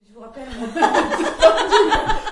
Sound of woman who’s laughing. Sound recorded with a ZOOM H4N Pro.
Son d’une femme en train de rire. Son enregistré avec un ZOOM H4N Pro.